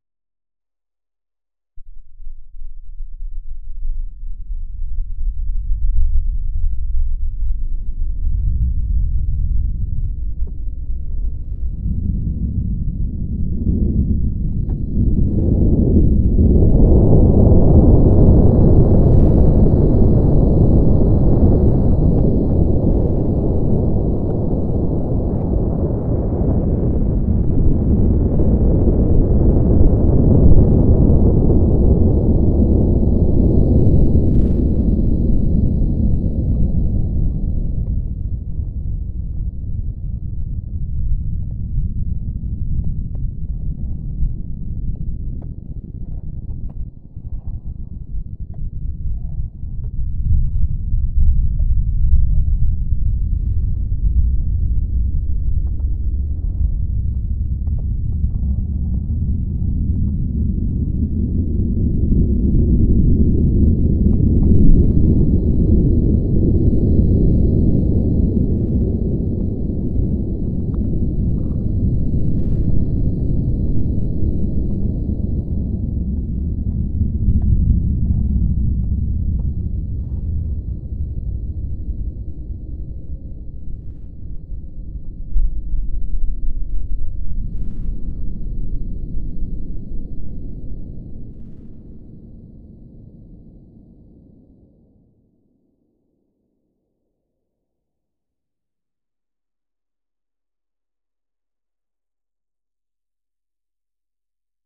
Sound of the earth opening up, something like the end of the world. Made using a whole bunch of filter plugins. Lots of sub bass. If you have a decent bass response, this will shake your windows. For the EarthFireWindWater contest.
armageddon, destruction, earth, rocks, rumble, volcano